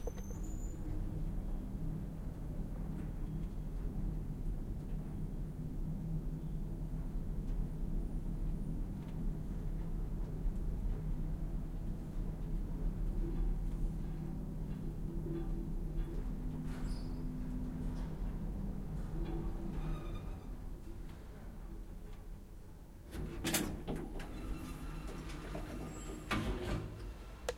A recording of going up to the 6th floor in an elevator at night.